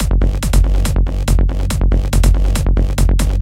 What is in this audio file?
kick and bassilne
club, dance, electro, electronic, hardcore, kick-bassline, loop, rave, techno, trance